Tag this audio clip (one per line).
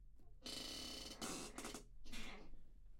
cracking
oxidado